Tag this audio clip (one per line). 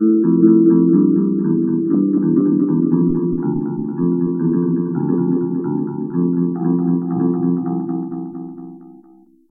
loop
ambient